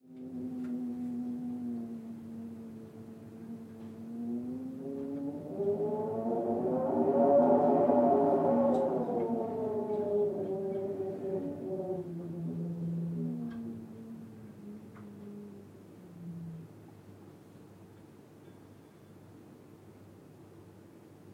spooky wind 4
Wind sound recorded with oktava mc012->AD261->zoom h4n
horror, night, recording, spooky, tube, wind